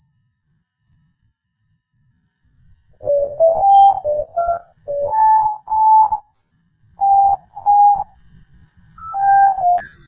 Another one that sounds like a jazz trumpet. fanx to danielc0307, for these amazing samples!!! These are really great, fank you very very much!